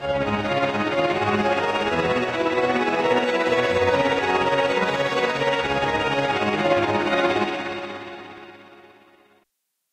A short string phrase with tremolo